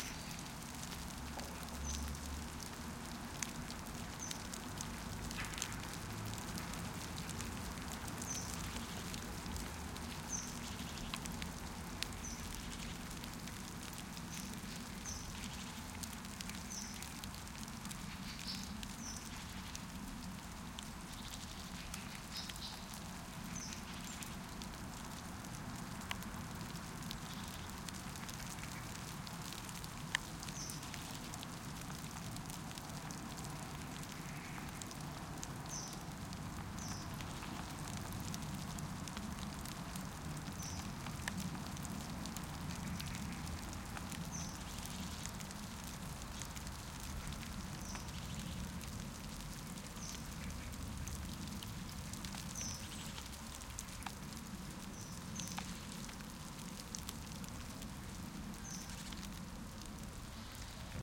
Sunday afternoon and now it's sleet falling after a day of heavy snow. Sometimes referred to as 'ice pellets', sleet is a form of precipitation that consists of small ice grains. They often bounce when they hit the ground, and generally do not freeze into a solid mass unless mixed with freezing rain. Here recorded falling onto deciduous, low to the ground branches. Applied some low end roll-off EQ. Recorded with a Sony PCM-M10 handheld recorder.